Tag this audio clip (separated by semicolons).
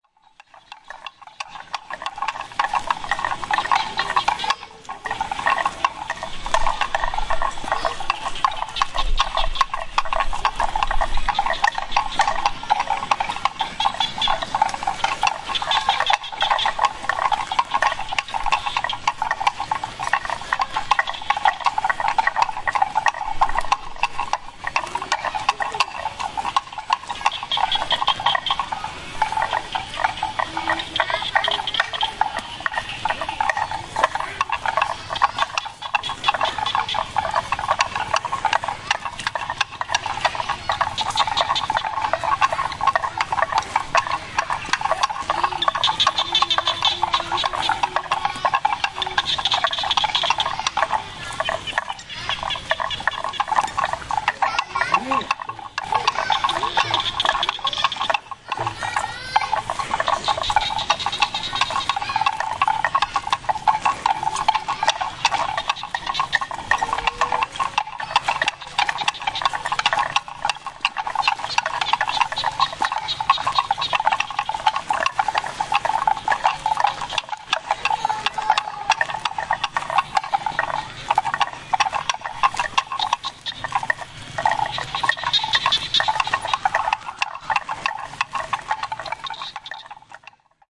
cambodia; cows; field-recording; pcm-d1; ta-prohm; wooden-bell